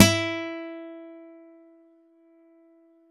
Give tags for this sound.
velocity multisample guitar acoustic 1-shot

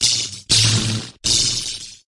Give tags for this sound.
electric electricity zap zapping

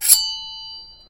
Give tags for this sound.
knife slash

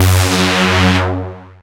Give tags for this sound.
synthetic Sound

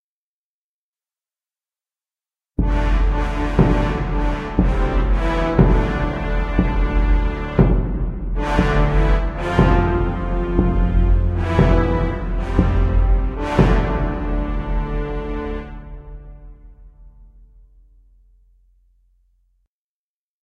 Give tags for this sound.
Rome antique announcement trumpet brass

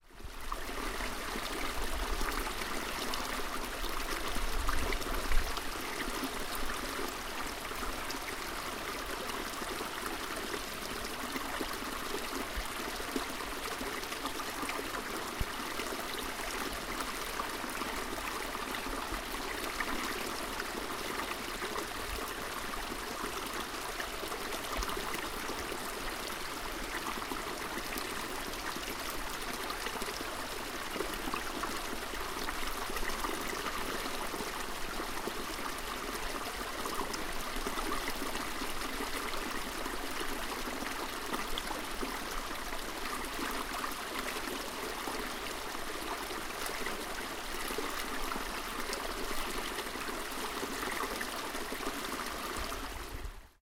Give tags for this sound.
field-recording stream

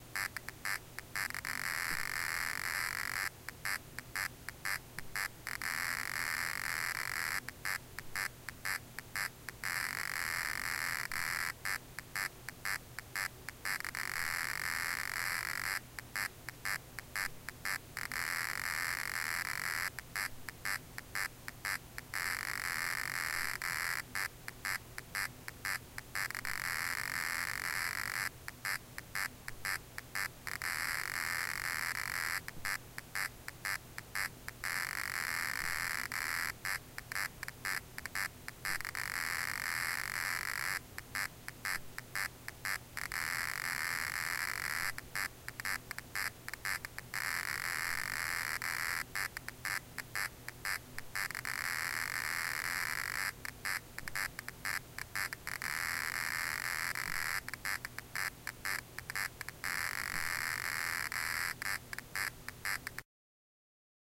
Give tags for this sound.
ambiance ambient atmos atmosphere background background-sound buzz electrical field-recording general-noise office room-tone

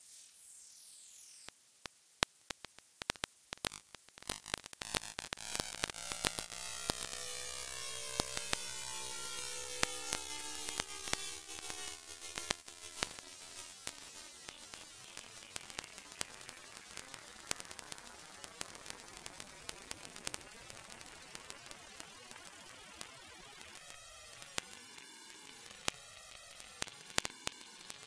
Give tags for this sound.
atmosphere minimalistic

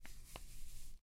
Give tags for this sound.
Sound Flower Touch

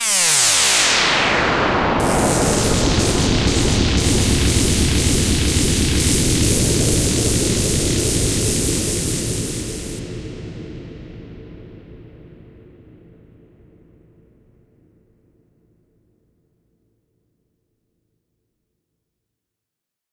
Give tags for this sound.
cinematic dramatic drop magnet magnetic oidz sweep

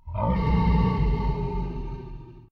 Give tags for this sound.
death necromancer sound

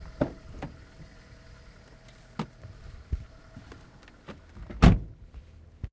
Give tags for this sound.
stop open a2 driving motor vehicle running-engine door enter close outside audi inside car engine

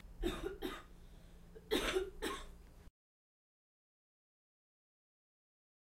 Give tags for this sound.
body cough human

